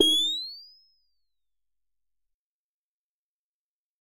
Tonic Electronic Whistle
This is an electronic whistle sample. It was created using the electronic VST instrument Micro Tonic from Sonic Charge. Ideal for constructing electronic drumloops...